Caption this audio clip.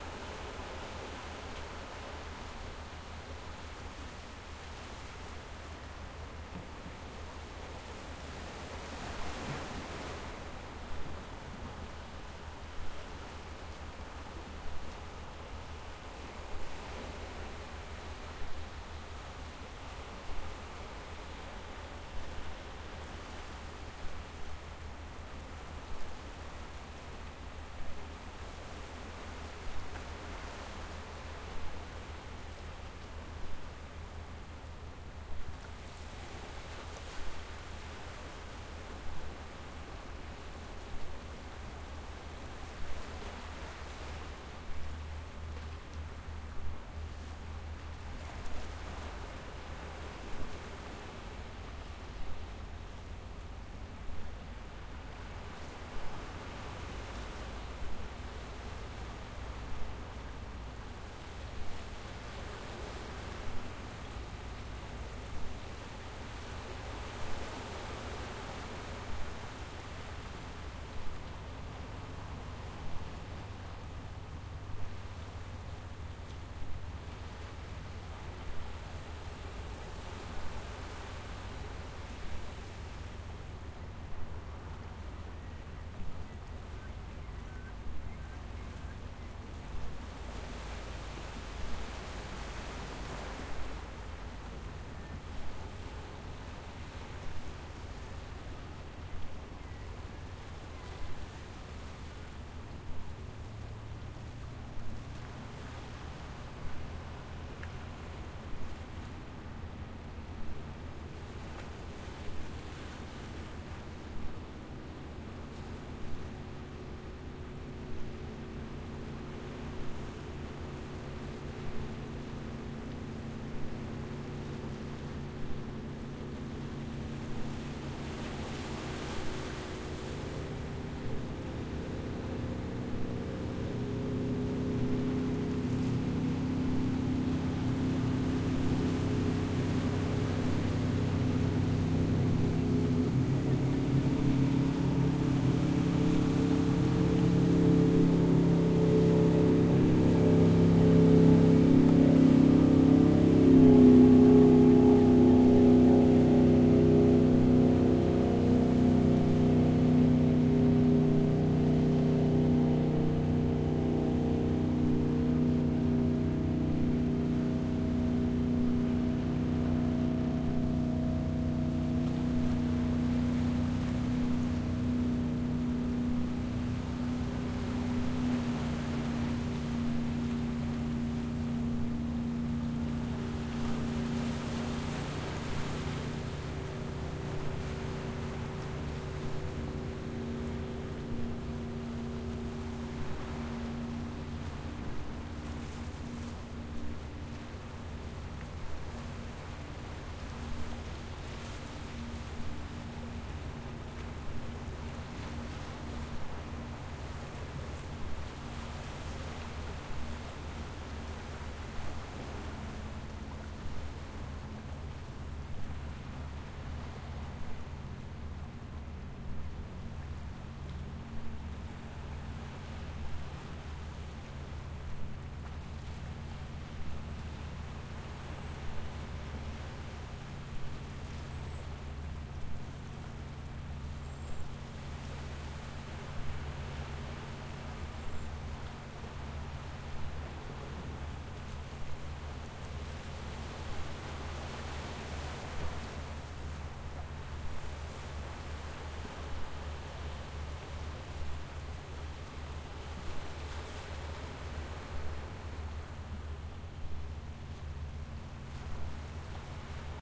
Ocean water on the rocks of the Maine coast, with occasional seagulls in the background. A fishing boats passes from L to R starting at about 1:50.